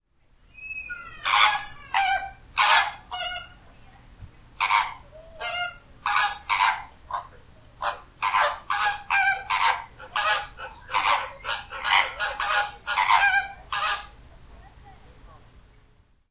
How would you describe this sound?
Flamingo Calls, Ensemble, A
Audio of a group of American Flamingos calling at the Jacksonville Zoo in Florida. There were about 20 of them. I've removed a large amount of noise that was present. A child can be heard screaming at the beginning.
An example of how you might credit is by putting this in the description/credits:
The sound was recorded using a "H1 Zoom recorder" on 22nd August 2017.
american, bird, call, calls, ensemble, flamingo, group